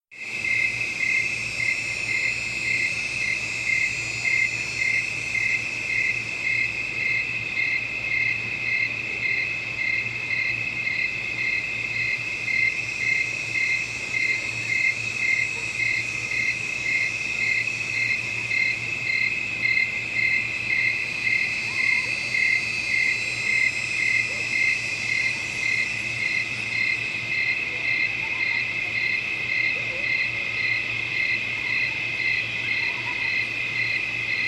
swamp at night with crickets and cicadas2
swamp noises recorded at Walt Disney World near the Wilderness lodge, including cicadas and buzzing of crickets over a dike
ambience, bugs, cicadas, crickets, field-recording, insects, nature, night, noises, swamp